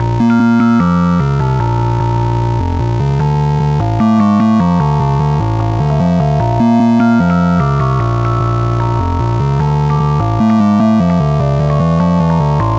bass + piano for another electronic sample